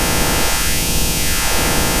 Sonified with Audio Paint from this image Rainbow mixed in Goldwave of Rainbow2 with the reverse of itself.